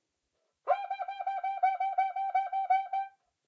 Different examples of a samba batucada instrument, making typical sqeaking sounds. Marantz PMD 671, OKM binaural or Vivanco EM35.
brazil, cuica, drum, groove, pattern, percussion, rhythm, samba